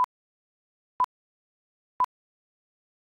1k bleeps -18dBFS 3 Seconds
BLEEPS, LINE, UP